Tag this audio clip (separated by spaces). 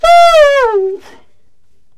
jazz sampled-instruments sax